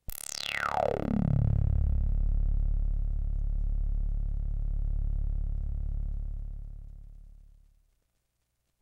Sound made with the Arturia Minibrute.
analog, minibrute, synth, synthesizer, synthetic